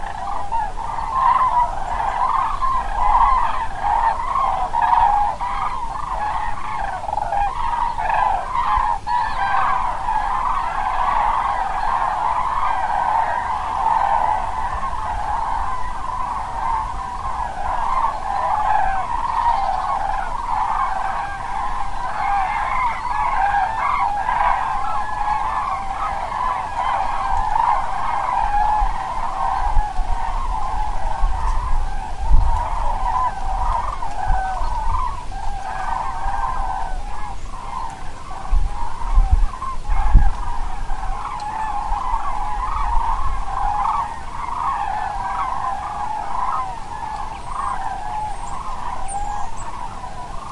cranes flight leaving France to spend winter in southern countries
cranes, migrating-birds, wading-birds